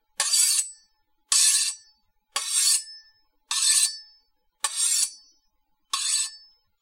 slow - Knife slides
A series of slow slides made with a meat cleaver over a bread knife.
Super fun to make.
bread, cleaver, clutter, knife, meat, scratch, sharpen, slide, steel, utensil